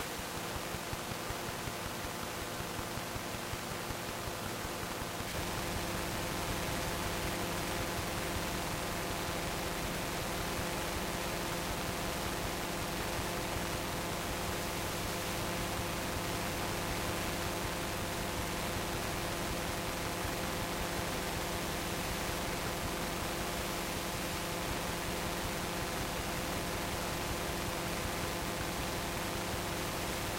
Radio Static FM Louder signal
Some radio static, may be useful to someone, somewhere :) Recording chain Sangean ATS-808 - Edirol R09HR
tuning, radio-static, fm, noise